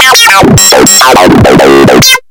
Some selfmade synth acid loops from the AN1-X Synthesizer of Yamaha. I used FM synthese for the creation of the loops.
an1-x, acid, yamaha, synthesizer, sequence